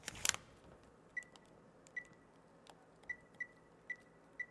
Key:Swipe Card
Key, Card